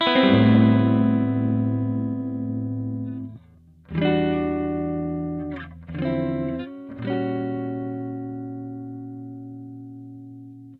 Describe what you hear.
jazz guitar unprocessed fender deluxe amp and dynamic microphone